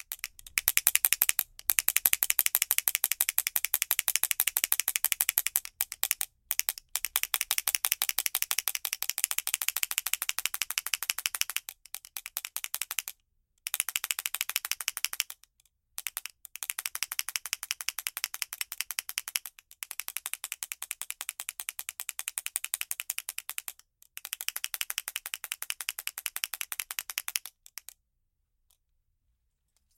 Brinquedo Matraca
ado, barulhento, brinquedo, child, children, engra, fun, funny, kid, noisy, toy